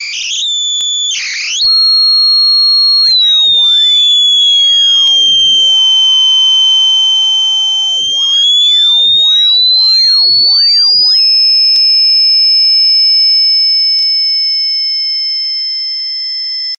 circuit bending baby radio fm